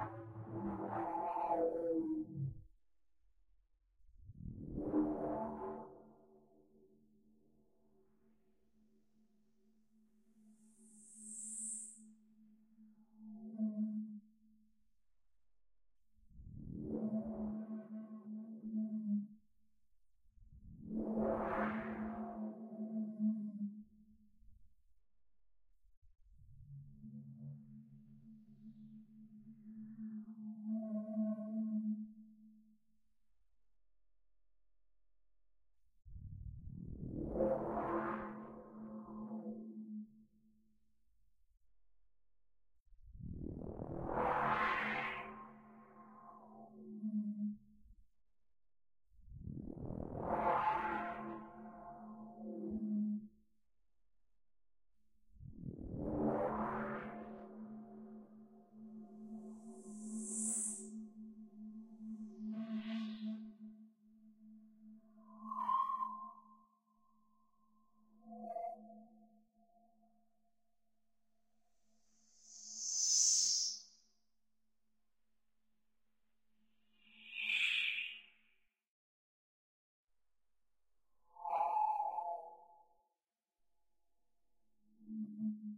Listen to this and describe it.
chant of the motherboard
Sounds generated by assigning the "wave out" or "line out" signal of the soundcard to a track in FL, and turnin it up. This acts as a digital delay effect with infinite feedback and a very high cycle rate.
Basically The noise (similar to pink noise) is produced by the soundcard and the resonance is produced by the low pass filter and the convolution reverb mapping of the Waldassen Cathedral.